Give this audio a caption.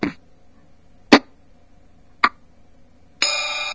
Old realistic concertmate soundbanks. Mic recorded. The filename designates the sound number on the actual keyboard.
concertmate, keyboard, lofi, radioshack, realistic, samples